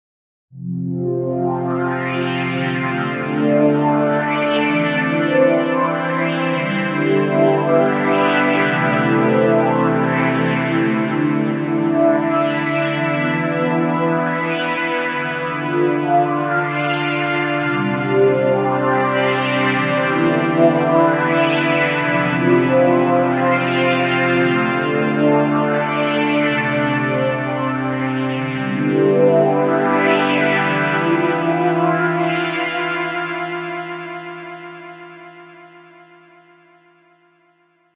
Ambient Chords 1
I recorded the same chords on a few different settings once. This is the first.
synth, texture, chords, ambient, pad, synthesizer, space